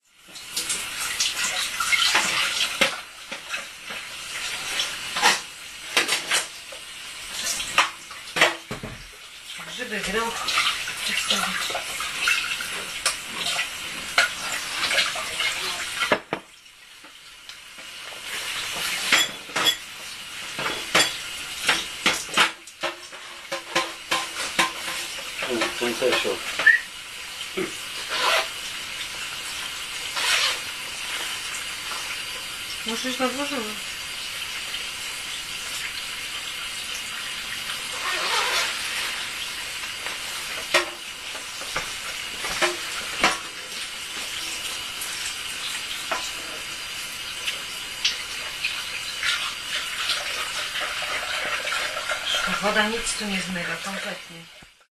washing dishes 241210
24.12.2010: between 15.00 and 20.00. christmas eve preparation sound. my family home in Jelenia Gora (Low Silesia region in south-west Poland).
washing dishes sound.
christmas, domestic-sounds, wash, washing, water